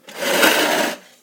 Chair-Folding Chair-Metal-Dragged-08
The sound of a metal folding chair being dragged across a concrete floor. It may make a good base or sweetener for a monster roar as well.